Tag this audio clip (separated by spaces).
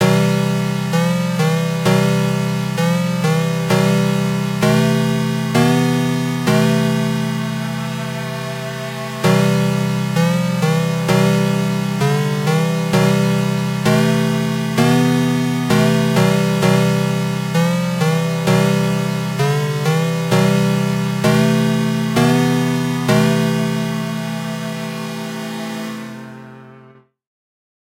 130bpm melody music pixel song